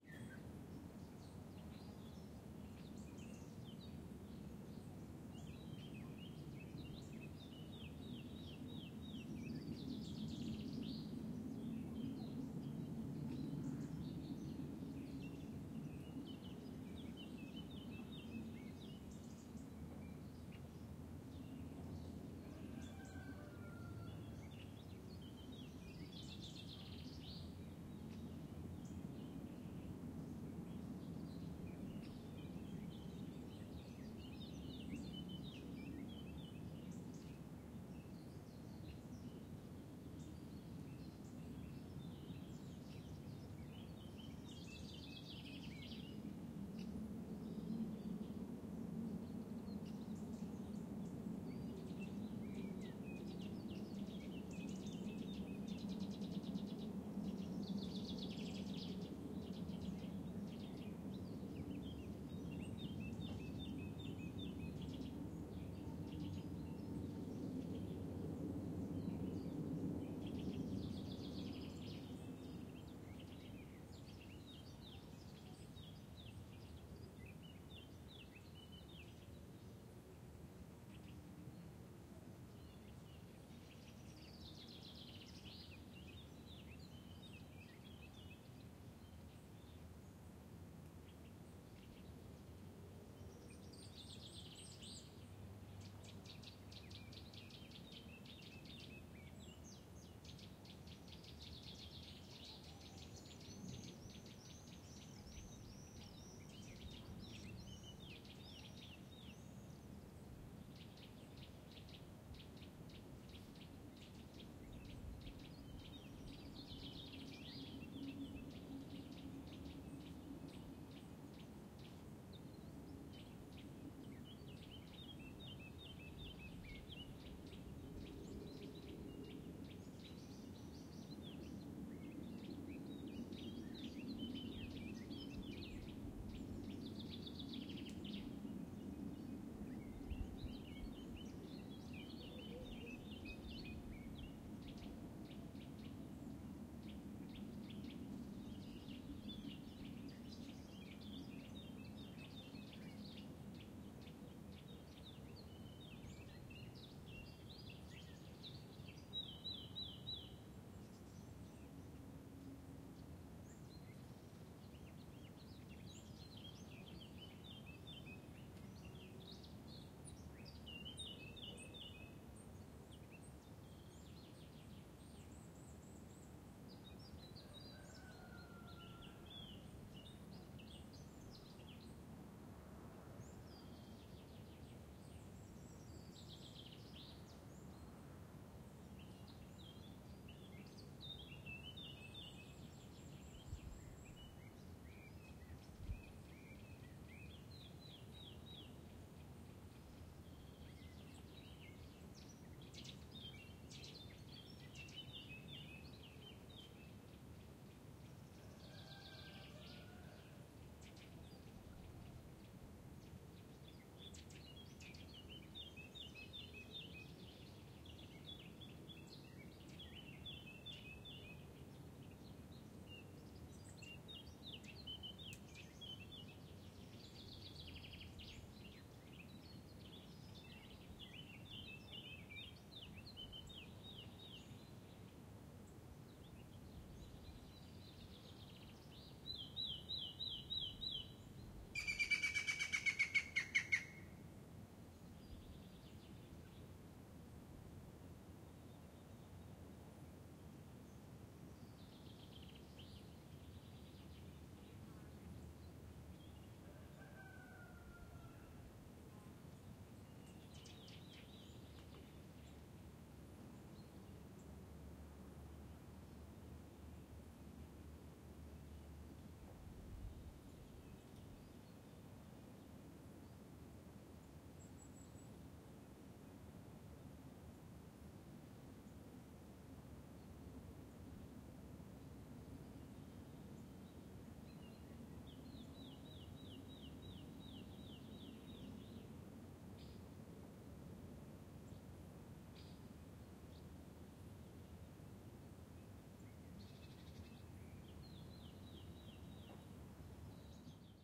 civenna morning
Not too far from Lake Como, Italy, outside a village called Civenna, just south of Bellagio, the countryside wakes up with birds, insects, and the sounds of boats on the lake far below. Recorded using a Zoom H4 on 5 July 2012 in Civenna, Italy. High-pass filtered. Light editing.
Bellagio, Civenna, Como, Italy, morning, mountain, town, village